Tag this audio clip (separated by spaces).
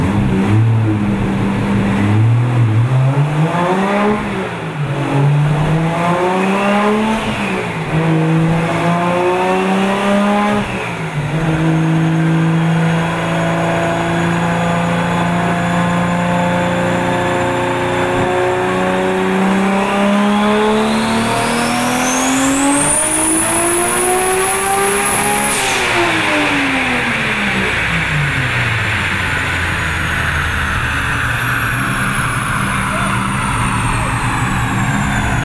shift car engine rev transmission manual turbo high accelerate performance import